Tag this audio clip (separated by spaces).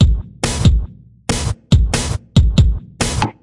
140bpm loop drums